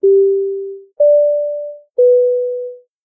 A320 tritone chime
This tri-tone chime can be heard on some Airbus aircraft. It is used when the crew is about to make an announcement.
A320, Aircraft, Airplane, Announcement, Captain, Chime, Plane, Seatbelt